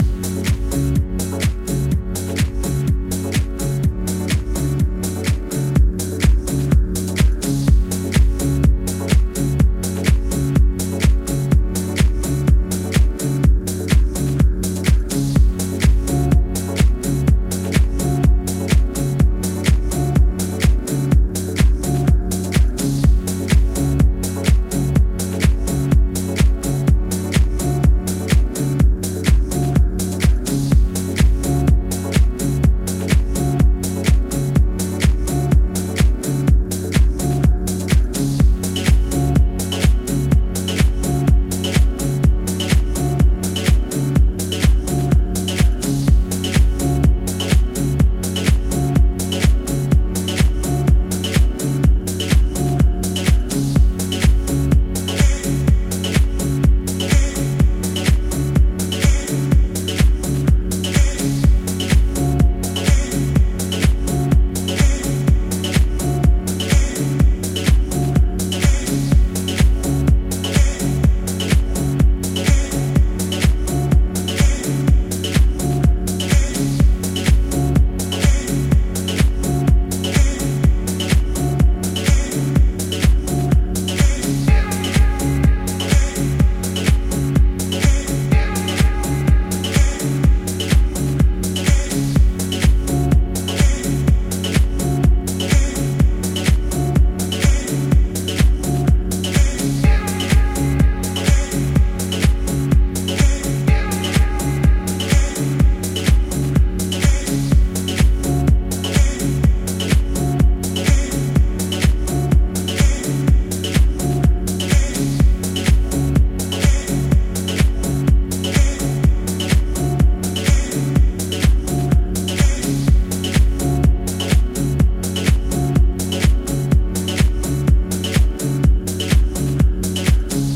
Trought the beat - Techno house track loop 125bpm
125bpm, beat, club, dance, effect, electro, electronic, fx, house, kick, loop, music, original, pan, panning, rave, snare, sound, synth, techno, trance, voice